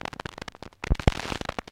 Various clicks and pops recorded from a single LP record. I distressed the surface by carving into it with my keys and scraping it against the floor, and then recorded the sound of the needle hitting the scratches. Some of the results make nice loops.
noise, glitch, loop, record, scratch, analog